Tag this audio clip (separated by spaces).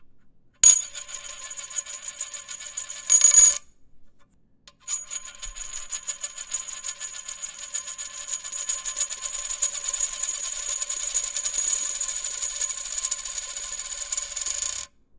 steel metal aluminum round roll